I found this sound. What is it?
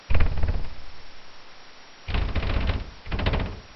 Vibrating metal ruler on cake tin (slow)